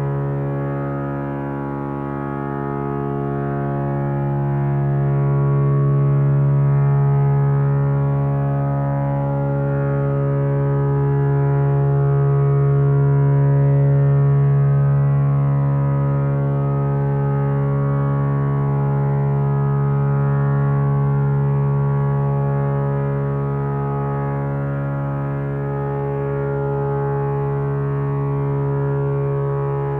French Linear Brass Waveform Stereo C#2
Stereo brass waveform recorded with analog synthesizer. It sounds like linear synthesis in style of French pop.